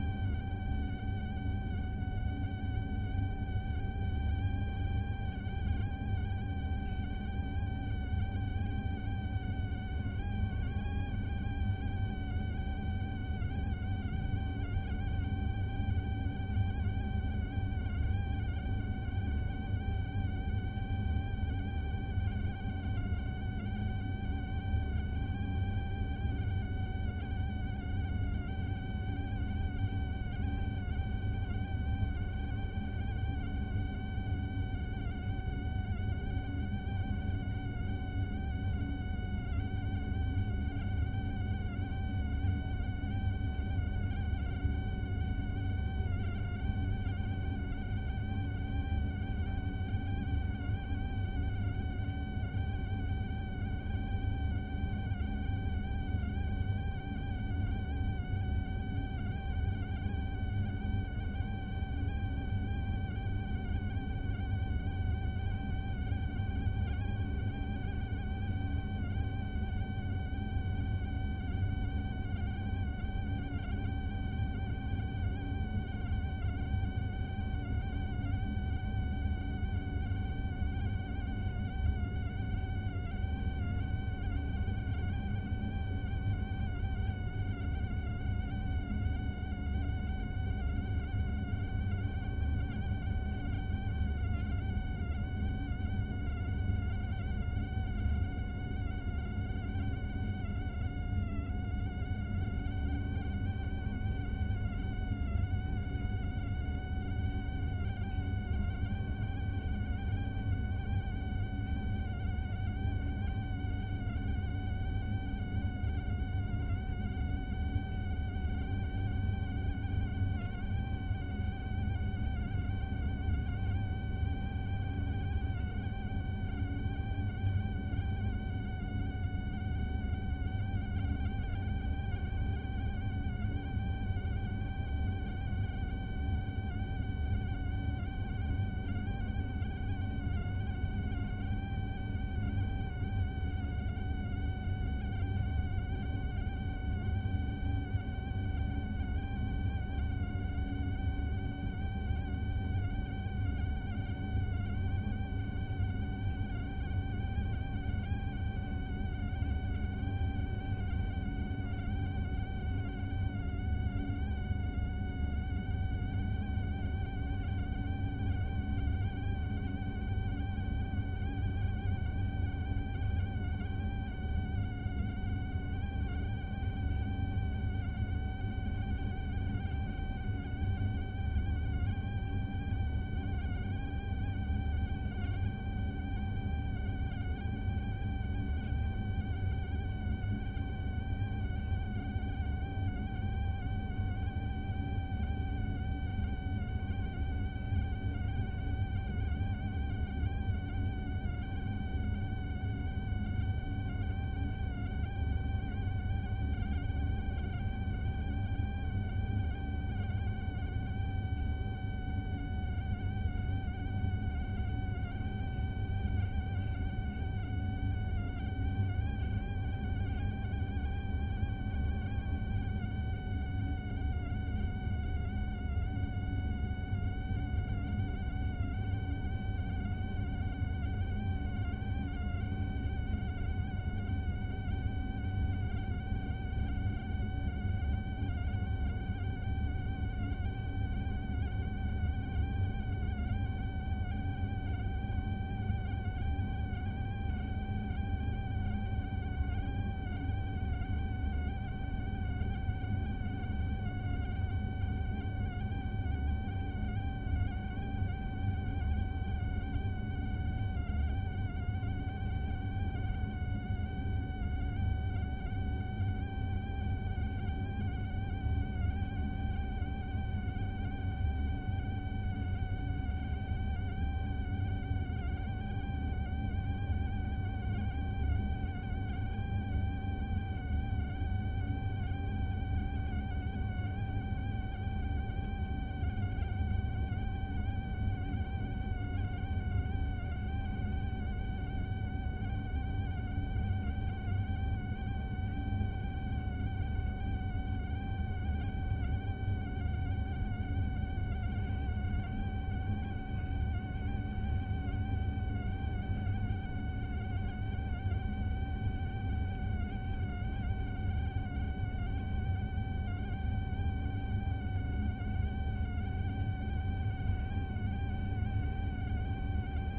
a dusty ventilation exhaust in a toilet-room.
EM172-> TC SK48.